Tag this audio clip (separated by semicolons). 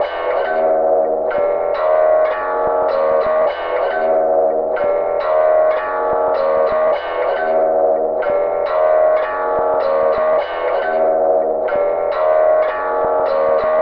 creepy; guitar; lo-fi